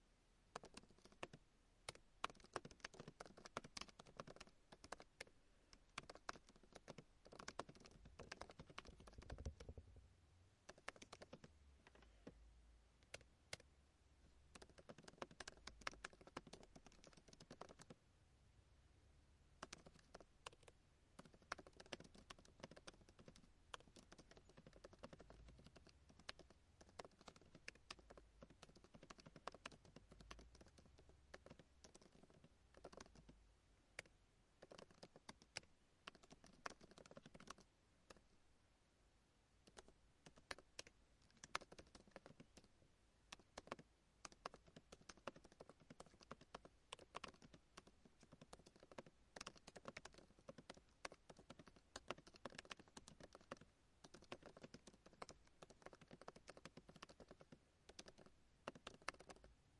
Typing on my laptop keys for a while, doesn't have quite the satisfying click clack as older keyboards but could be used for a modern keyboard.